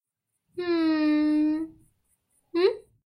sad sigh and happy "sigh"
me humming in different moods
girl, happy, sad, sigh